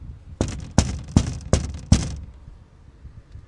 Glass Knock
Hand knocking on glass
Knock, Creepy, Hand